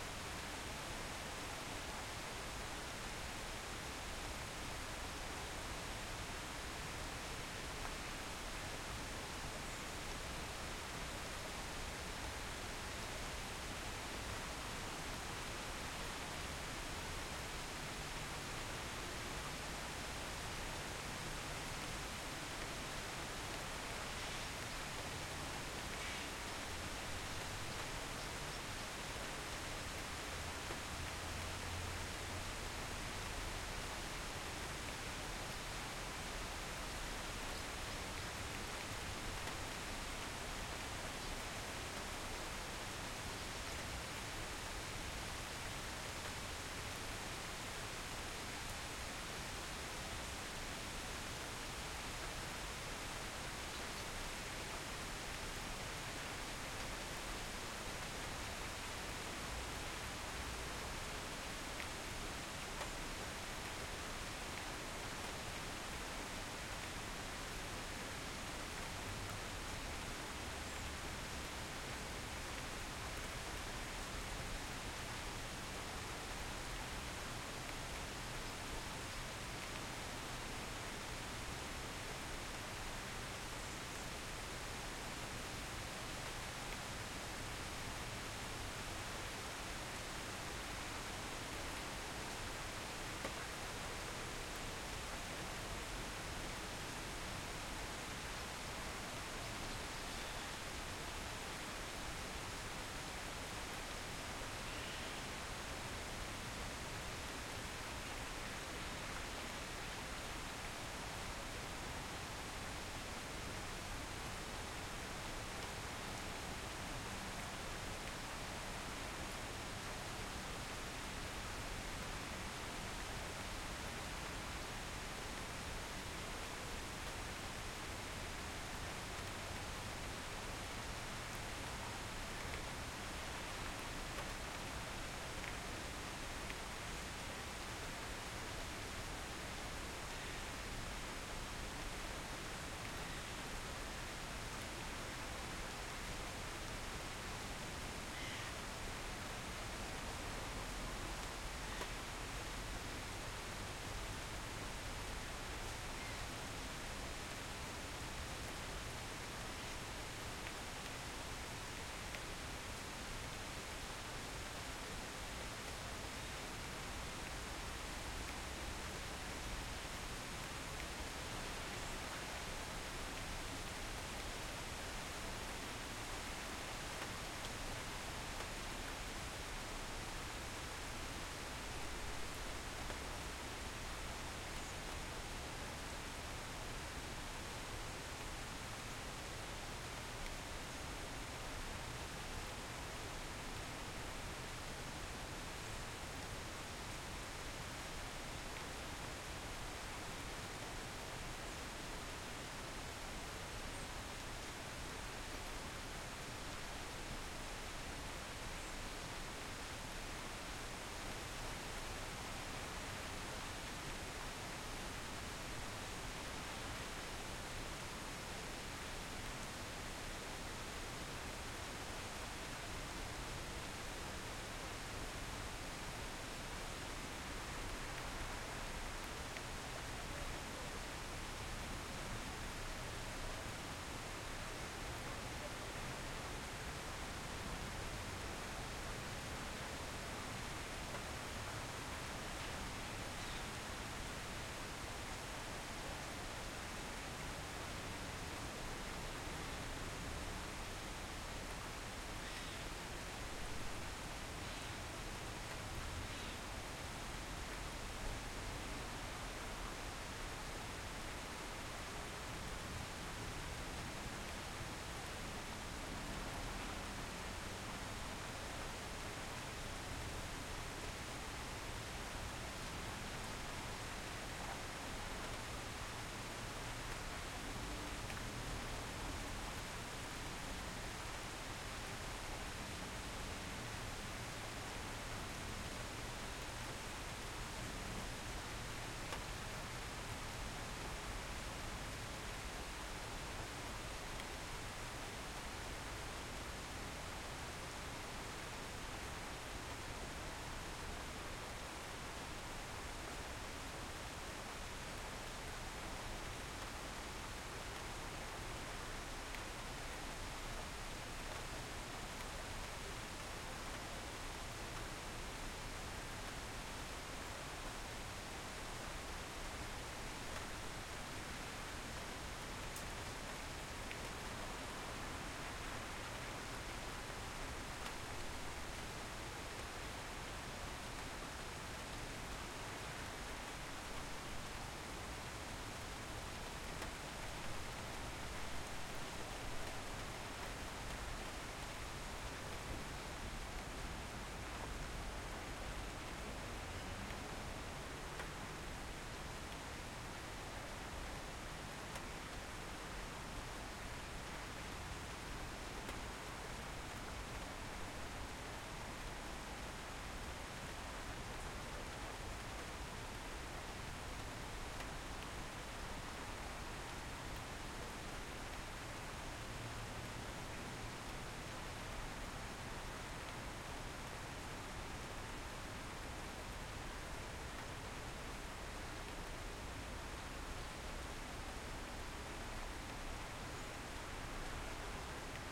rain in a city park 01
light rain in a city park with trees. MS mic technique.